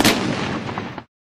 Mk 46 30mm gun - single shot
Quite loud, quite heavy, quite powerful military equipment.
attack, projectile, explosion, military, gun, bang, army, shot, aggressive, destruction, aggression, explosive, fire, cannon, gunfire, firefight